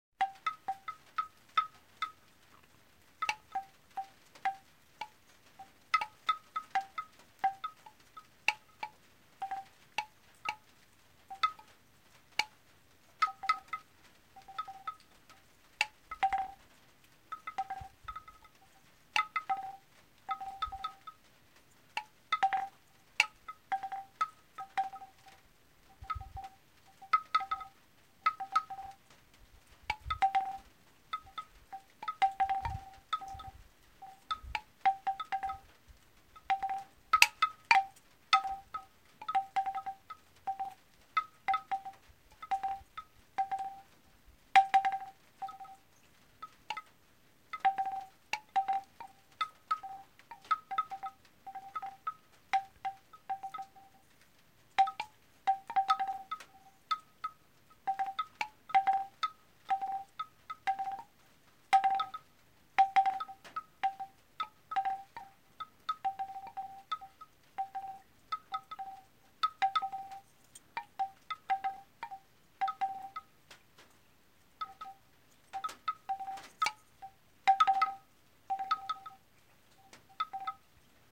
Windbell playing music during rain
ambient, bell, drops, field-recording, forest, house, nature, night, rain, relaxing, sound, water, wind, windbell